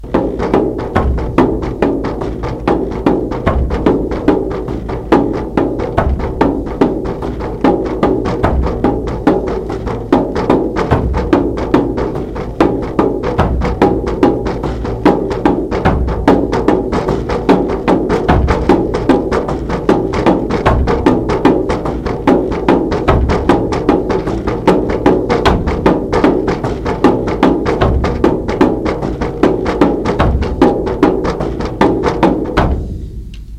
Continued experiments on own design of drums. All four are closed bodies, skin is synthetic and sticks are aluminium, glass or plastic.
drums, experimental, rythms